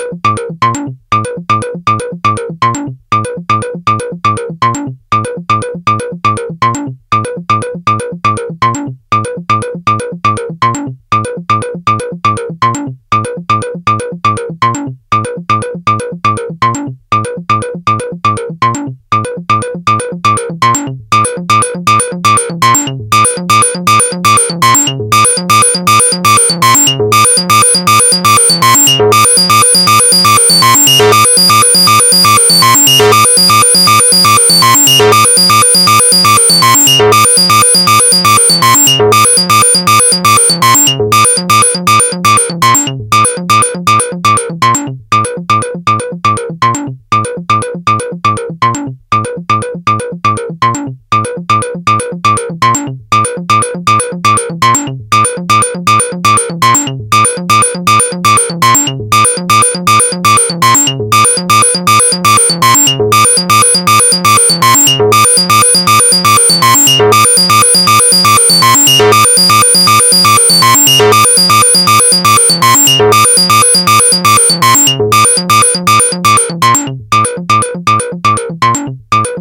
Some recordings using my modular synth (with Mungo W0 in the core)
Analog, Modular, Mungo, Synth, W0